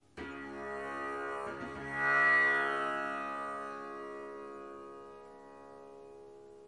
Tanpura Short notes 02
Snippets from recordings of me playing the tanpura.
Tuned to C sharp, the notes from top to bottom are G sharp, A sharp, C sharp, Low C sharp.
In traditional Indian tuning the C sharp is the root note (first note in the scale) and referred to as Sa. The fifth note (G sharp in this scale) is referred to as Pa and the sixth note (A sharp) is Dha
The pack contains recordings of the more traditional Pa-sa-sa-sa type rythmns, as well as some experimenting with short bass lines, riffs and Slap Bass drones!
Before you say "A tanpura should not be played in such a way" please be comforted by the fact that this is not a traditional tanpura (and will never sound or be able to be played exactly like a traditional tanpura) It is part of the Swar Sangam, which combines the four drone strings of the tanpura with 15 harp strings. I am only playing the tanpura part in these recordings.
tanpuri, indian, tanbura, ethnic